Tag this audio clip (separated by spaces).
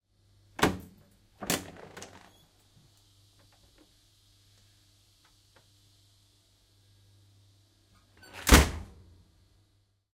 opening closing doors train open shut close door slam